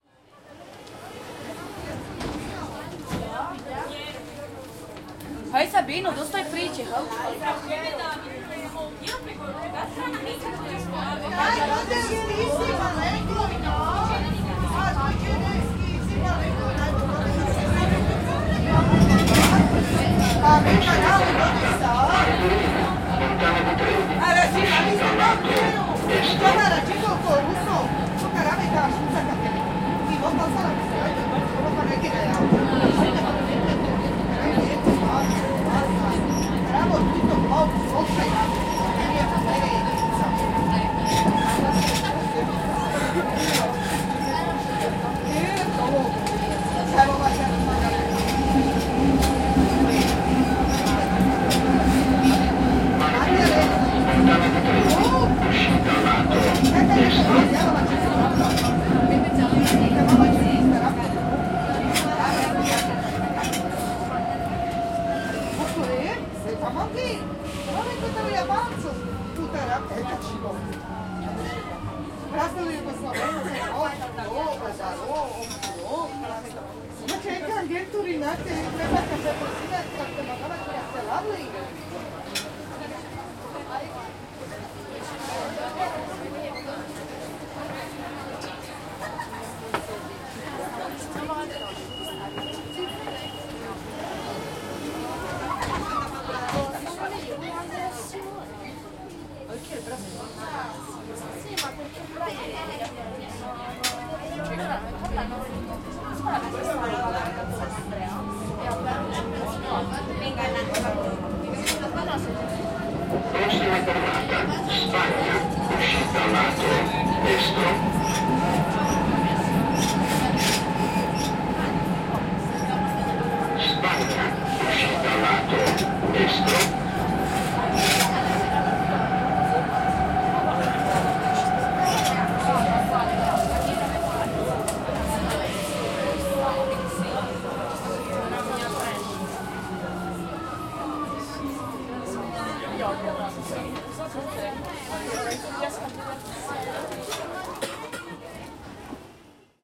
Rome Inside The Metro
Sounds from inside the Metro in Rome. You can hear also people talking in italian and a talkoff voice advising for next stops.
Sonidos grabados dentro del Metro en Roma, además podemos escuchar voces de gente hablando en italiano y megafonía indicando las próximas paradas.
Recorder: TASCAM DR40
Internal mics
handheld-recorder, Roma, italiano, locucin, dr40, Italy, talkoff, voices, Subway, travel, Metro